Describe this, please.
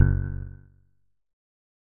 This sound is part of a series and was originally a recorded finger snap.